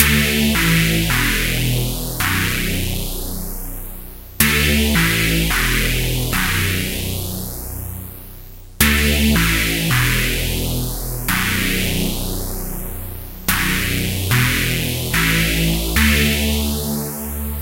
biggish saw synth d a b e 198 bpm-03
biggish saw synth d a b e 198 bpm
loop, dance, wave, saw, techno, electro, club, rave, house, bass, synth, electronic, trance